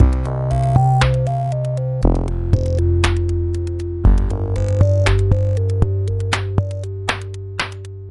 Simple melody with synth-drumloop made with VSTi. cheers :)